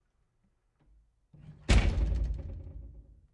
011 House DoorClosing
Closing door sound
door, room